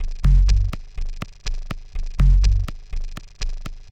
Addon loop 2-123 bpm
loop, minimal, 2-123, bpm, addon